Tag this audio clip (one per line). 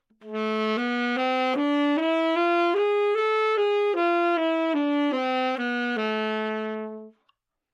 tenor
scale
Aminor
good-sounds
sax
neumann-U87